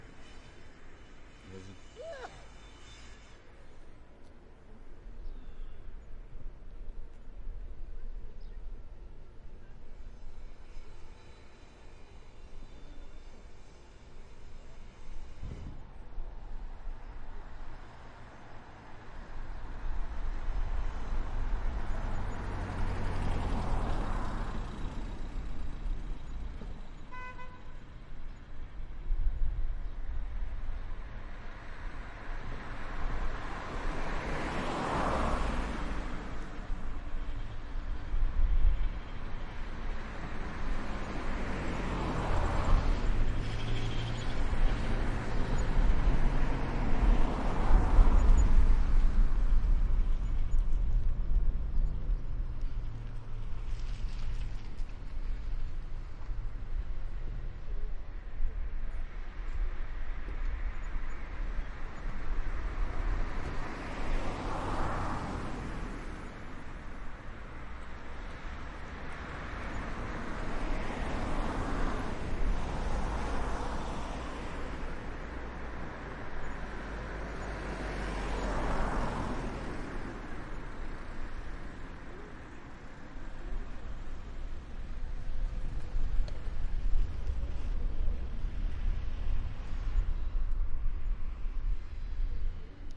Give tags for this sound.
cars; traffic; street; road

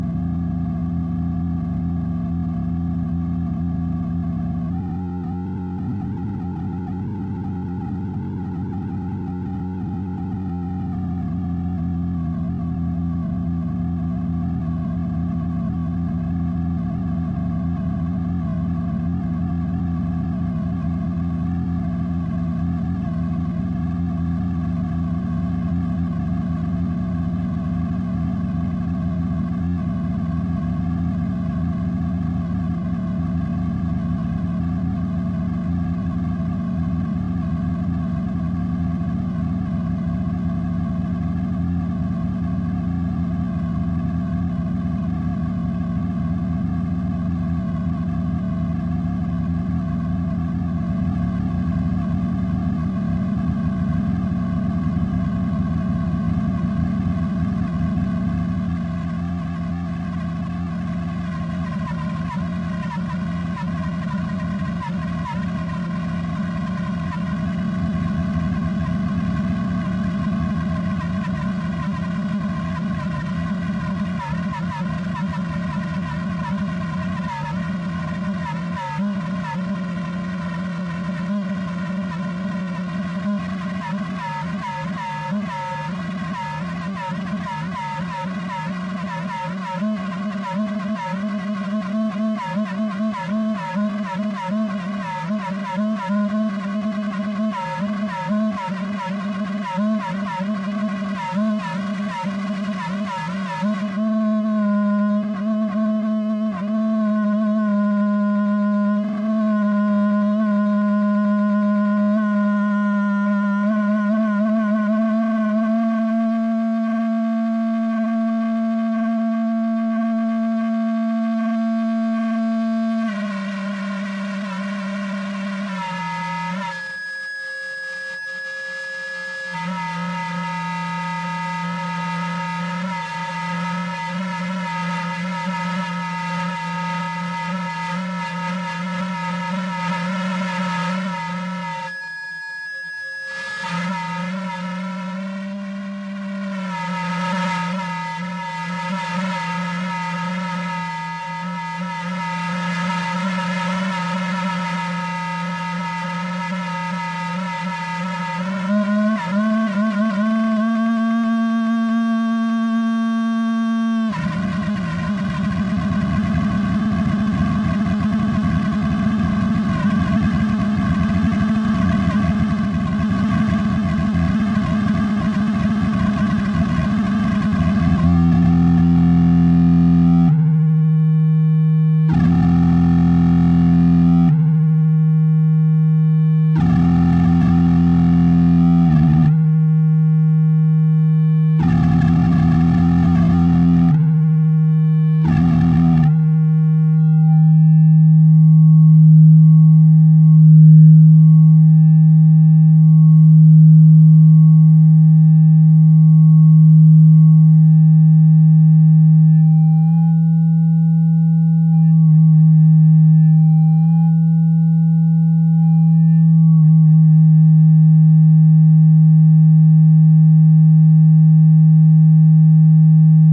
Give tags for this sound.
analog; feedback-loop; wave

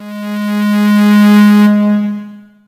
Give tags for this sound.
reaktor; multisample; saw; pad

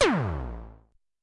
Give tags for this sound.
Korg; FX; Minikorg-700s

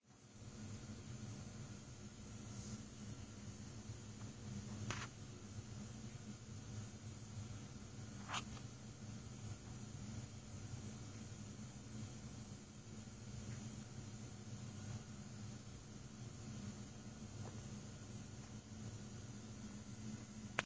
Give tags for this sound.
hum; buzz

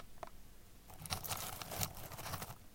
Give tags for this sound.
crackle; scrunch; rustle; potpourris; crunch

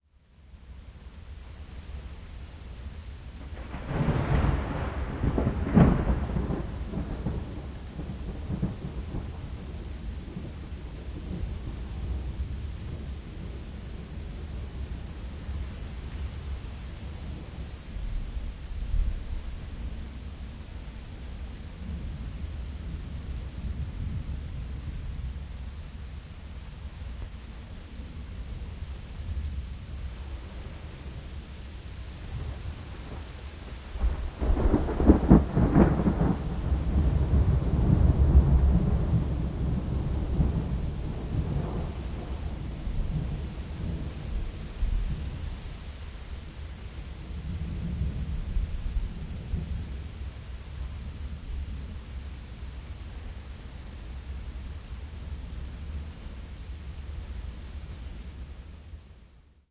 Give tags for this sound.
soundeffect; effect; thunderstorm; thunder; field-recording; rain

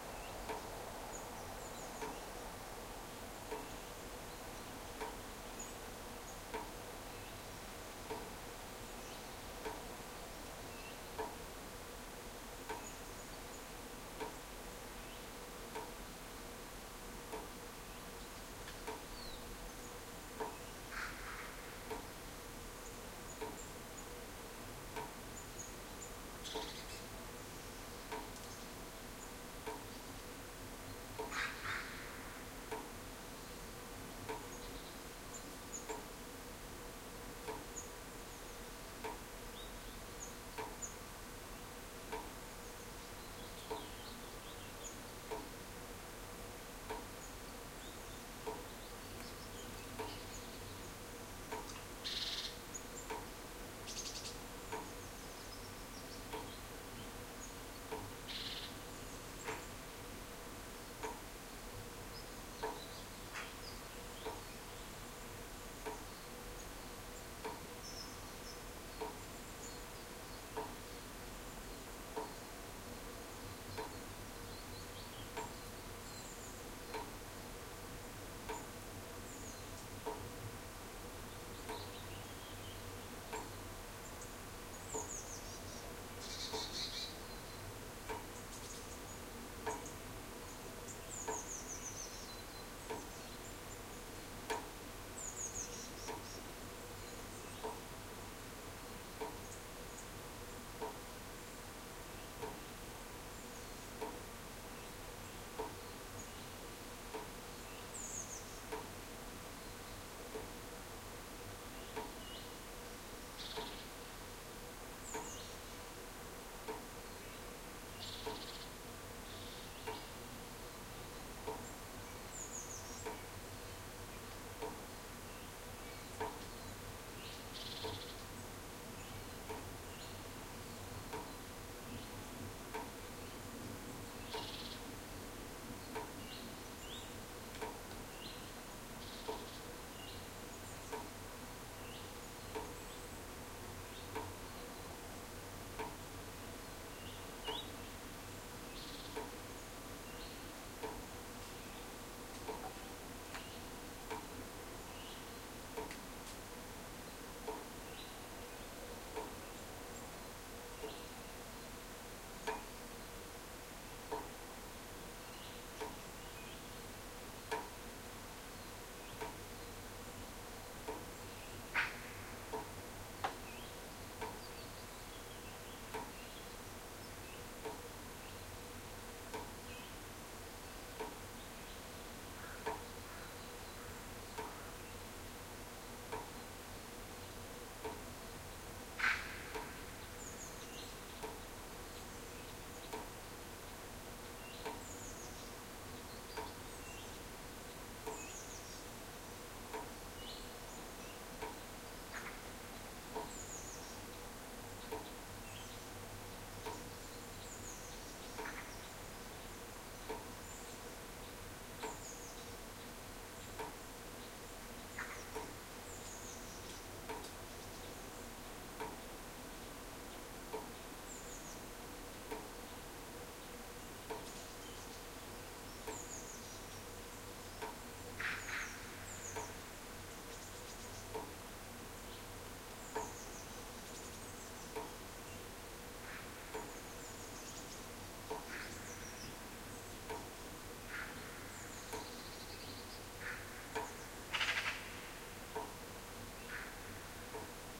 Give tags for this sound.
field-recording; birds; summer

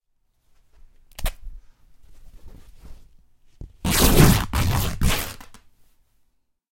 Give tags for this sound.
rupturing; breaking; drapery; ripping; tearing

